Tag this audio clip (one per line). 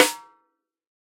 1-shot
velocity
drum
snare
multisample